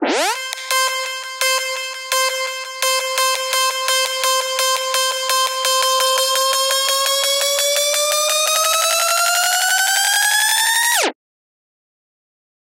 A synth rise perfect for that ultimate dance floor drop
FX, Sample, UK-Hardcore, Hard-Dance, Electric, Electric-Dance-Music, Effect, EDM, Hardstyles, Dane